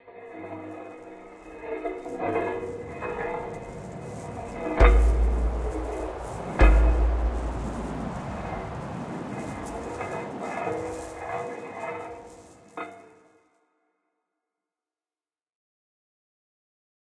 small-perussion sounds, pitched very low combined with noise and some fx. enjoy.
air, athmo, deep, drone, effect, high-resolution, scifi, sfx, wired